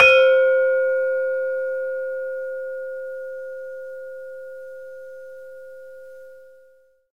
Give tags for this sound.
demung; gamelan; pelog

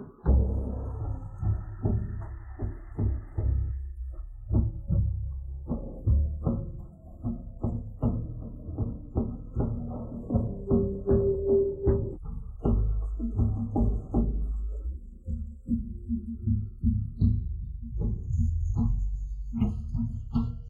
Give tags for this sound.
FragmentedJazzTune; Splitter; Stems

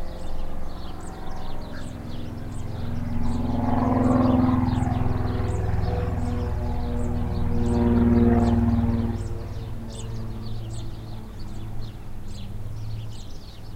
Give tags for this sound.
south-spain; airplane; birds; nature; field-recording; marshes

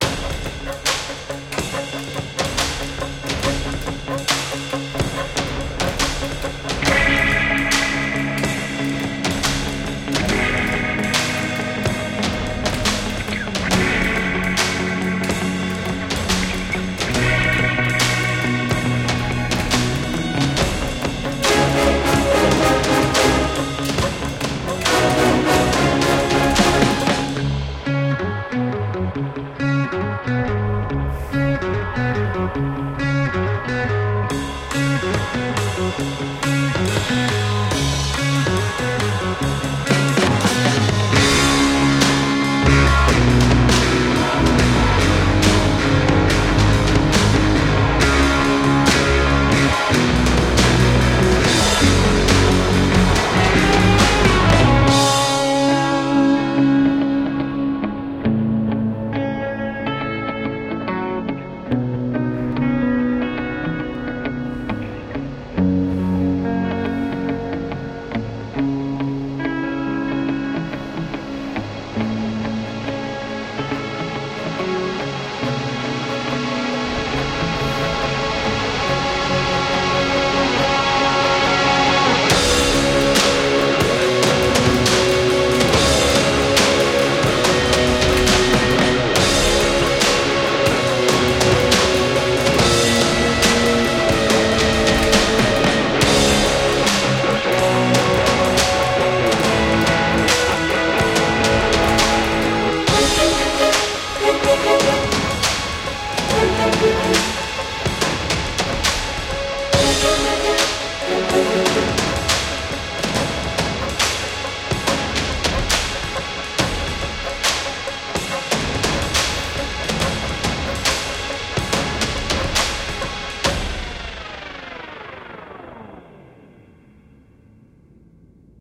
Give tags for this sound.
dark; drums; epic; garbage; guitar; music; percussion; steampunk; stroh-violin

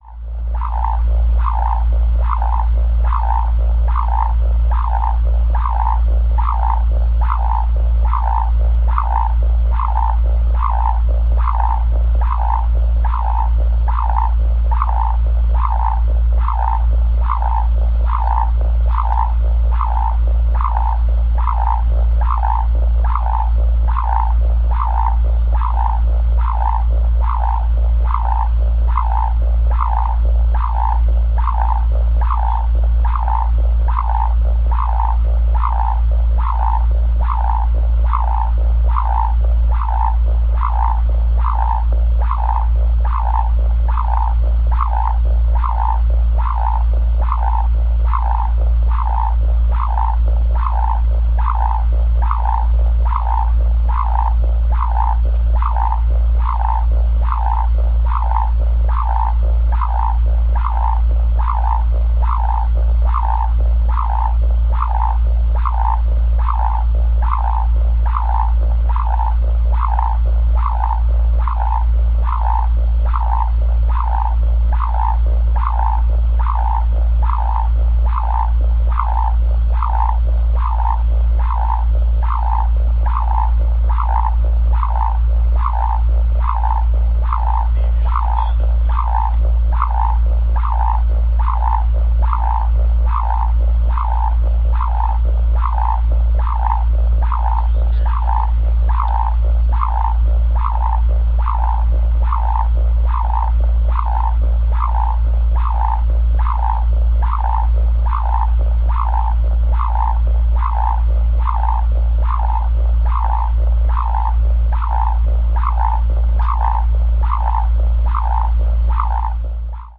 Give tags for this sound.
Contact; Cryogenic; Industrial; Machinery

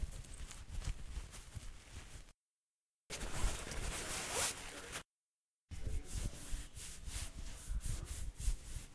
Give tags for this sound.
fabric; trousers

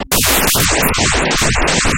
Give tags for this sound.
additive; noise; synth; synthesis; synthetic; weird

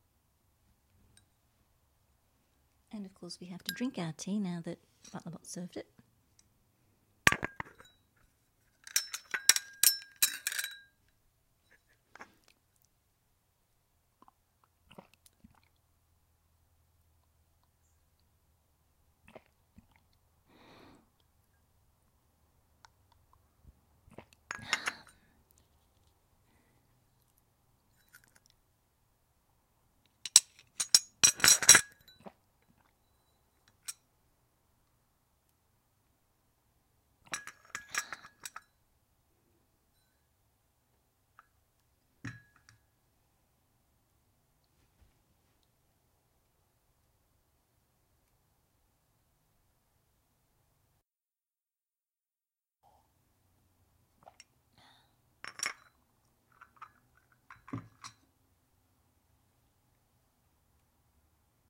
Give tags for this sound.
drink; pot; tea

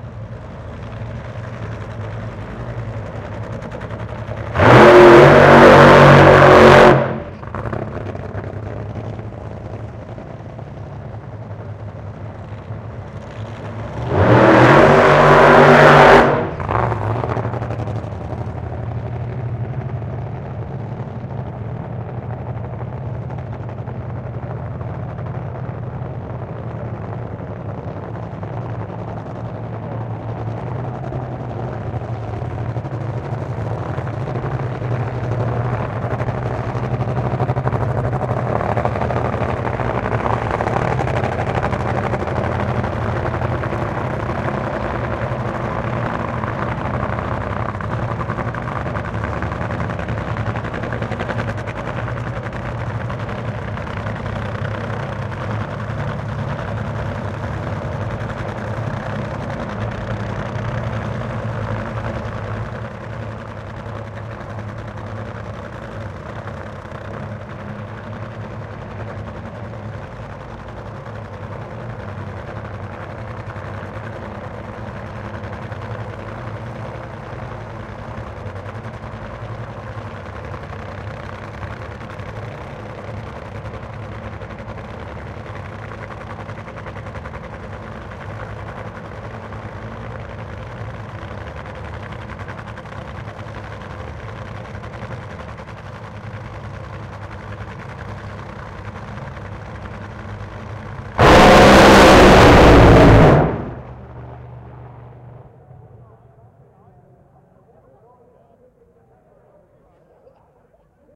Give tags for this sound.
Drag-Racing; Dragster; Engine; Motor-Racing; Race

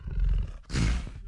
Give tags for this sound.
Growl; Roar

